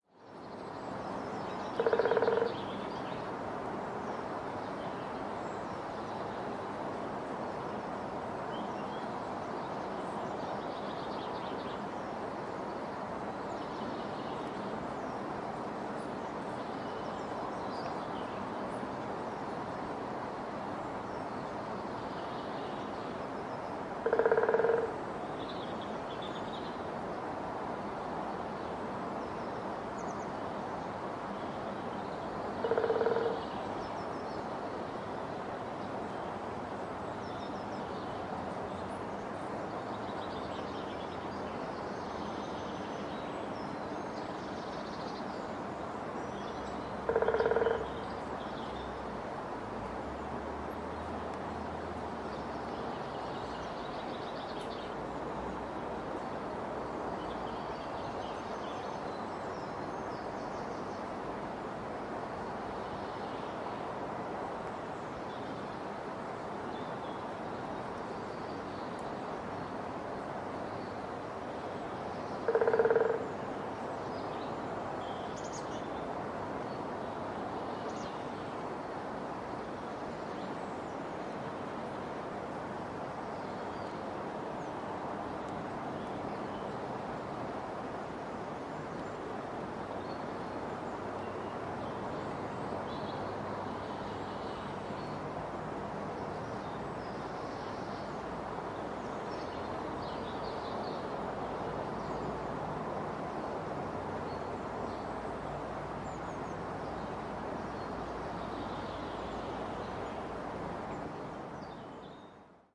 An ambient recording from Boleskine with a clear woodpecker sound.
Stereo recording made using Zoom H1 recorder and edited using audacity.